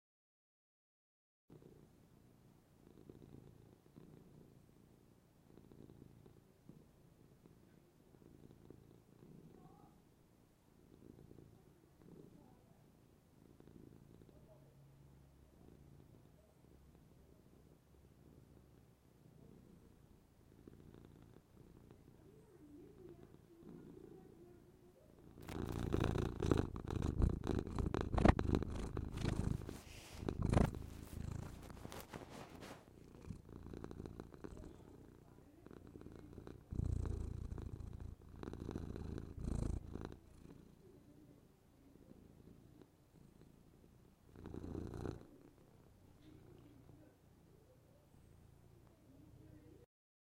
Kedi mırlaması (cat purring)
recorded with ECM8000
animal, cat, purring, pet, purr